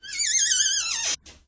Recording of the hinge of a door in the hallway that can do with some oil.
creak, creaking, door, hinge